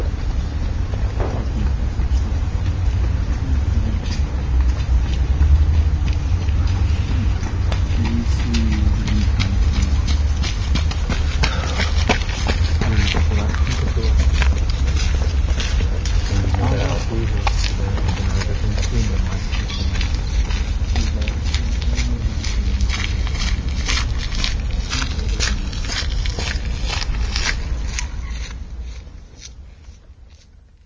Passos de Pessoas a Conversar Parque da Cidade
This is a sound of people walking while they're talking in Parque da Cidade. This sound was recorded with our handmade binaural microphones.
people, talk, ulp-cam, walk